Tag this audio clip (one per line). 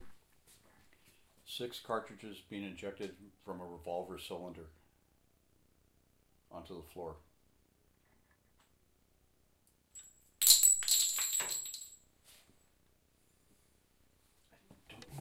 fall
spent
six
bullets
clink
shells
cartridges
casings
gun